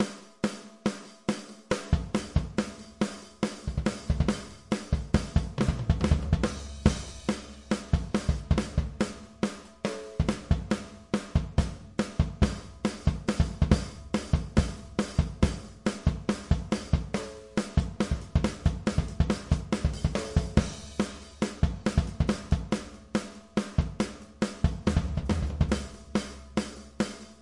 punk-rock groove 140 bpm
long punk rock groove 140 bpm
rhythm, beat, drum, groove, loop, loops, acoustic-drum, punk-rock